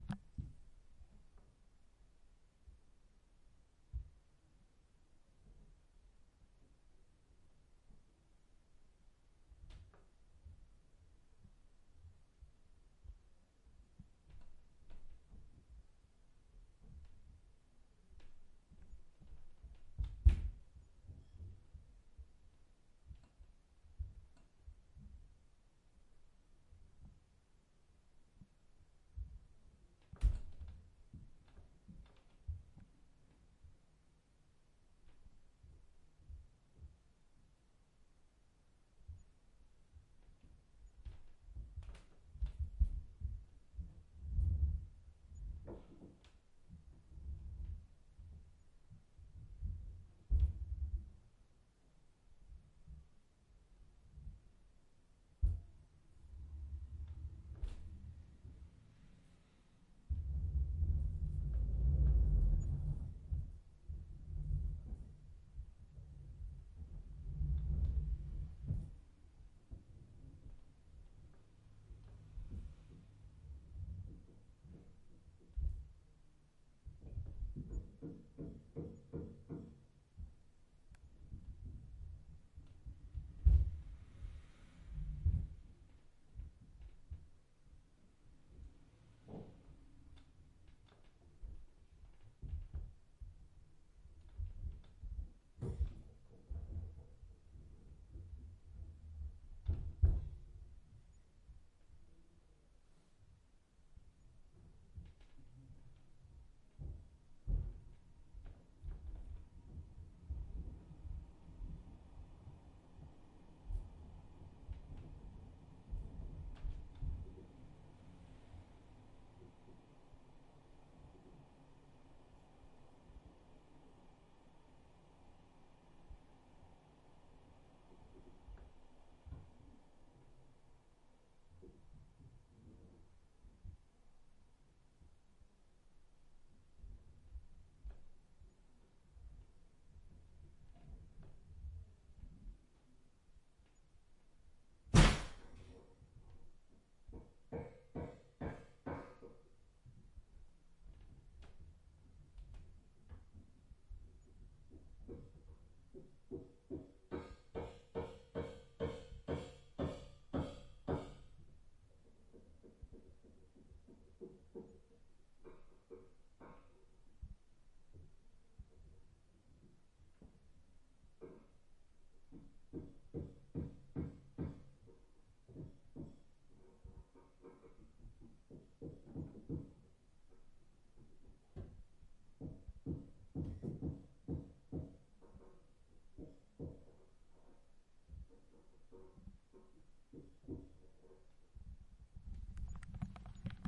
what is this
building work on the floor above
My neighbour is working at the second floor. Metal hit, pushing heavy stuff, crackings and vibrations by some human body mass at the old wood floor. In the second plan, we can hear passing a train and some cars. Quiet atmosphere with singular acoustic, like a sunday's work.
floor, quiet, above, field-recording, tone, ambience, neighbour, room, hit, hammer, ambiance, metal, background, building, ambient